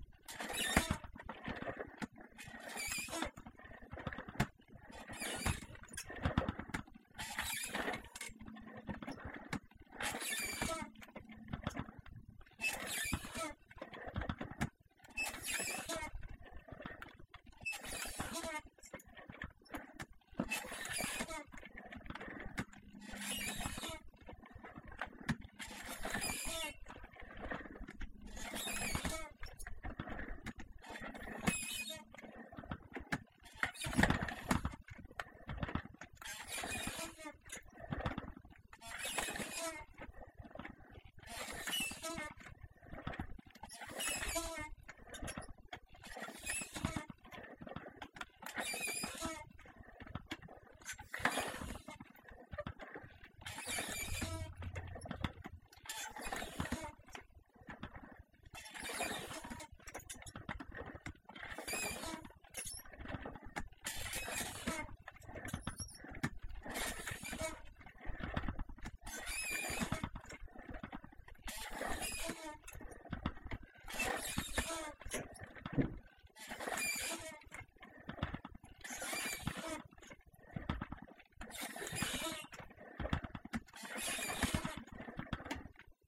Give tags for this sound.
device
pull
pain
pump

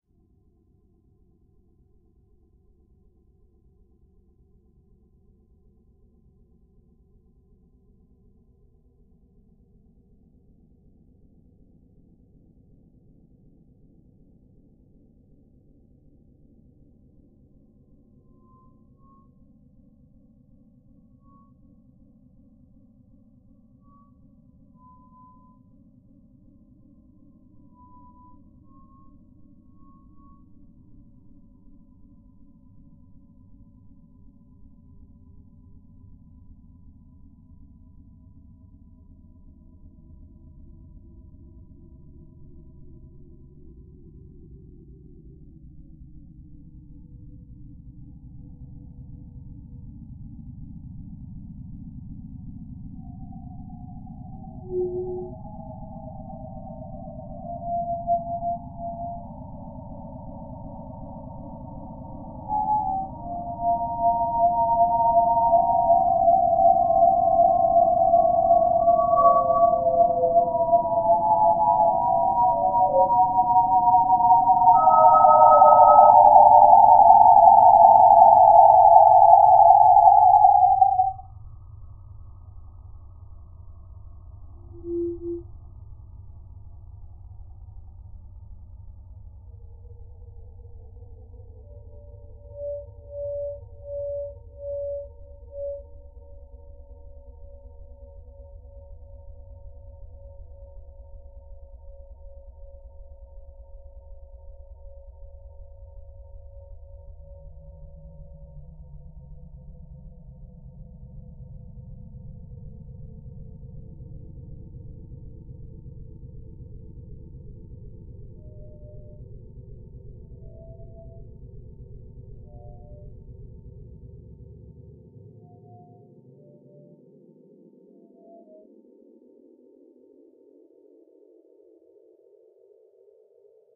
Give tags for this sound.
brainwave
high
whine
sound
pitch
ascending
note

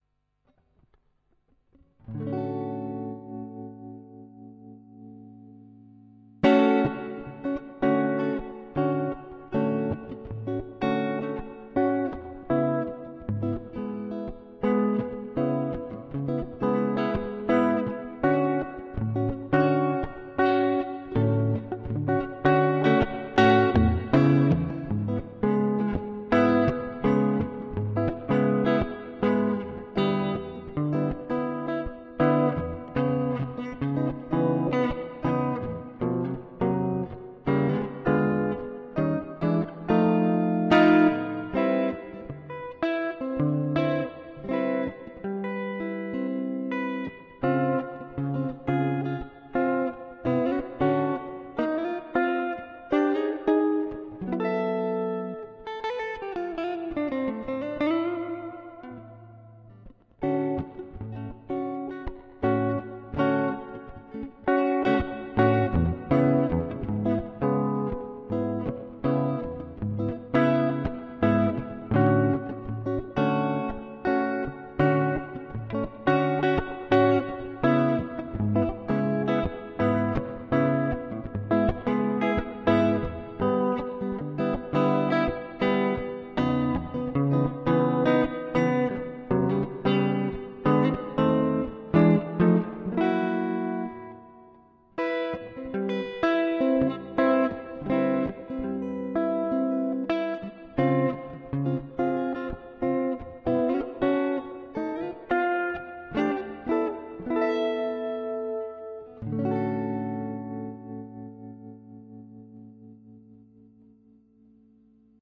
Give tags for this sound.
Chords
clean
echo
electric-guitar
Jazz
melodic
melodical
music
reverb
reverberation
sample
seventh-chords
song
syncopation

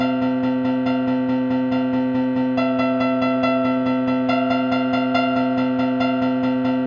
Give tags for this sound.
free
guitar
drums
sounds
filter
loops

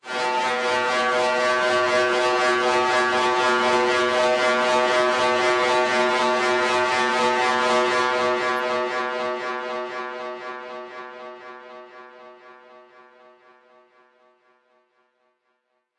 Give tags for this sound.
Terminator
Robot
Noise
Factory
Industrial

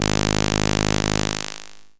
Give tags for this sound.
basic-waveform
impulse
multisample
reaktor

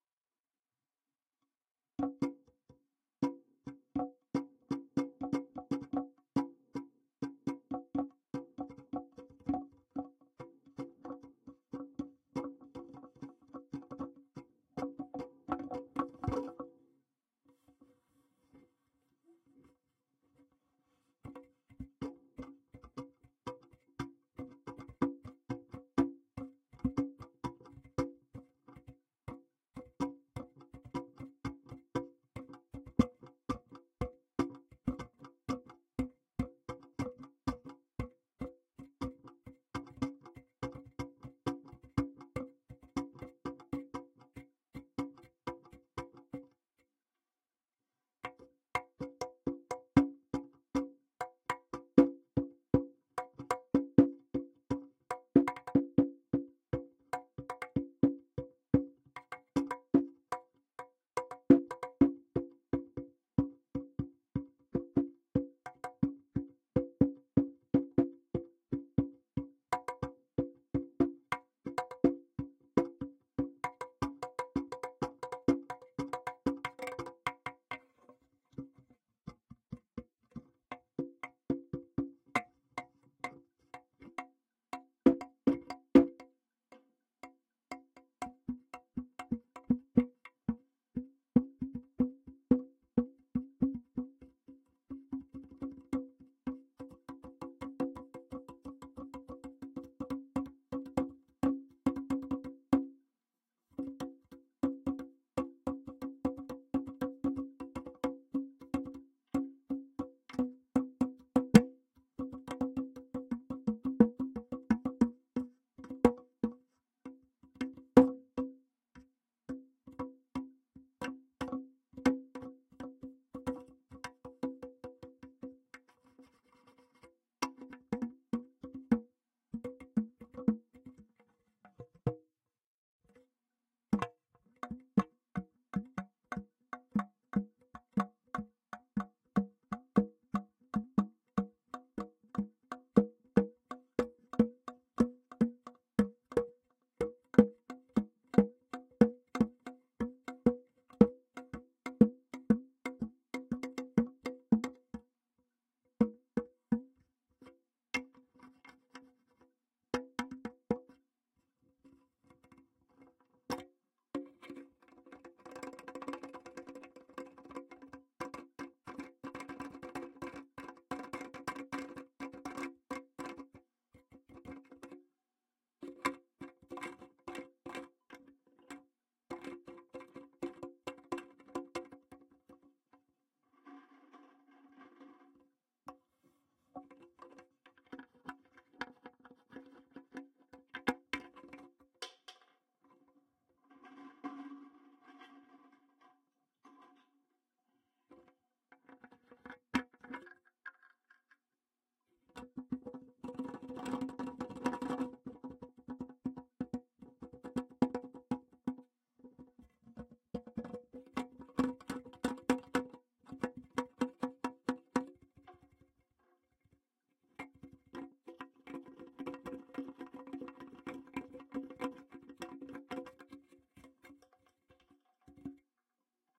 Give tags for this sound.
c4
conga
delphi
pipe
pipes
plastic
pvc
rubber
s1
s4
spring
string

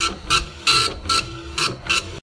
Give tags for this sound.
ambient
effect
printer
printer-loop

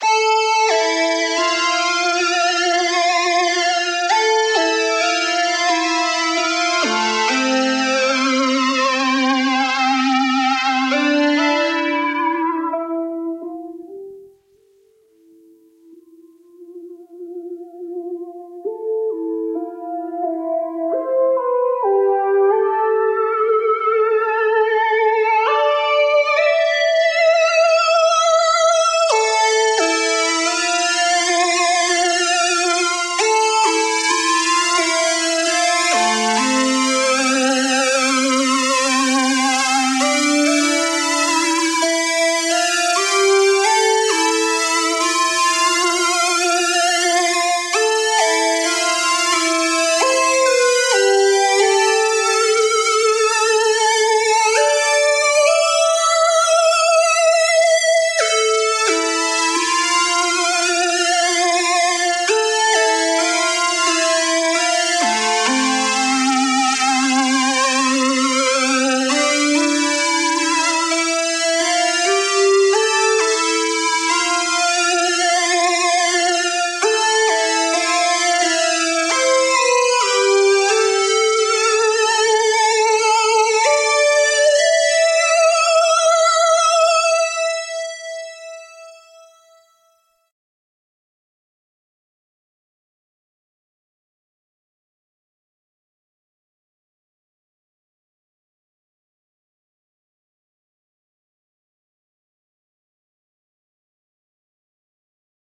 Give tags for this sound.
trance
melody
loop
lead
uplifting
synth

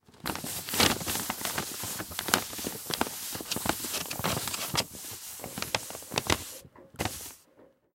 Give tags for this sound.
hand
rub

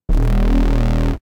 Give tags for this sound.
bass
crunchy

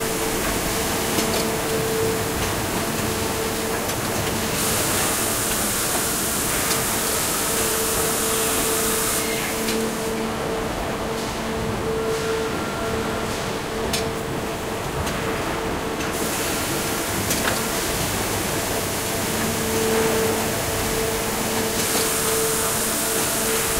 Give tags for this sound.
factory
field
wroclaw

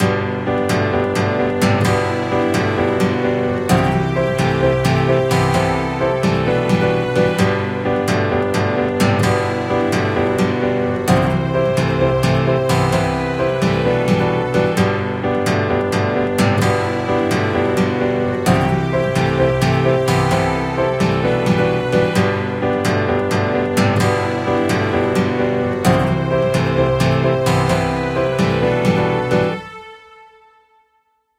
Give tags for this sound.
acoustic
background
broadcast
chord
clean
guitar
instrument
instrumental
interlude
intro
jingle
loop
melody
mix
movie
music
nylon-guitar
pattern
piano
podcast
radio
radioplay
sample
send
sound
stereo
strings
trailer

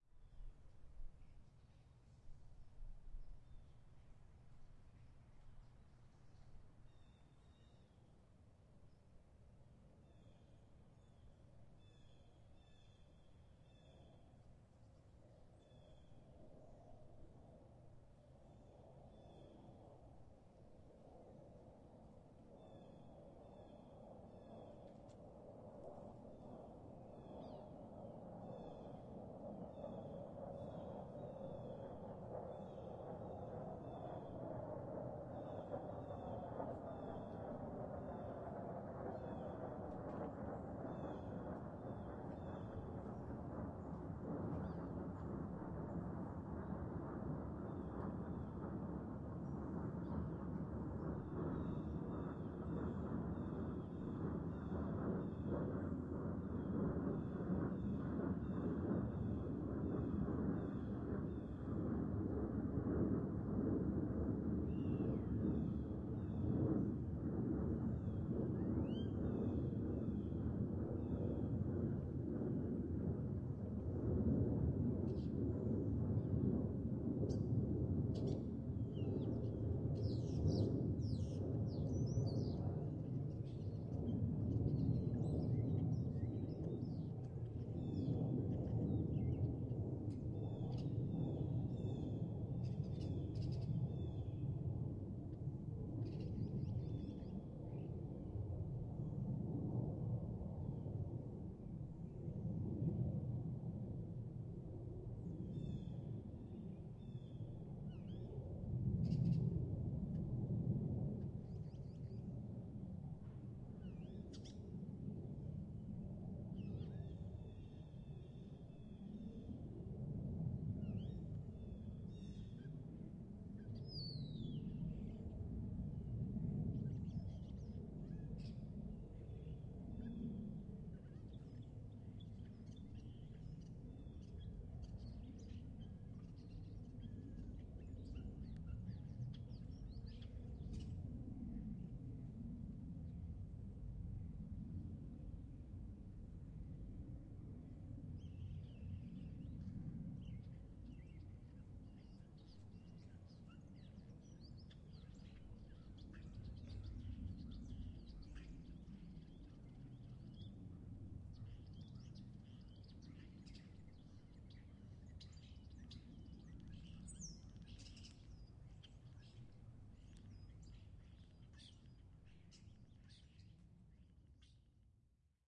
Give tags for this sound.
marsh
pass
plane

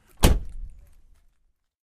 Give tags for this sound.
close
door
exterior

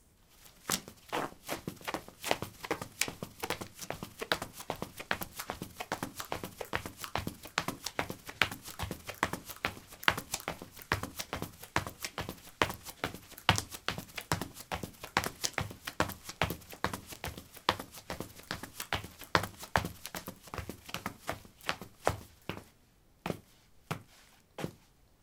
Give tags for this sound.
footsteps
step

